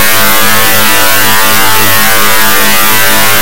Fat glitch
An interesting fat and agressive-sounding glitch. A bit synth-like. Made in Audacity.